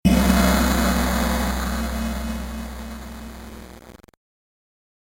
A sample of a tam-tam down-sampled to 8-Bit Resolution.
8, bit, gong, nes, nintendo